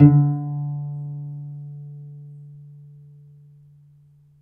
A pizzicato multisample note from my cello. The sample set ranges from C2 to C5, more or less the whole range of a normal cello, following the notes of a C scale. The filename will tell you which note is which. The cello was recorded with the Zoom H4 on-board mics.